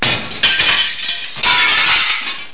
Sound recorded in my kitchen
brakes, field-recording, glass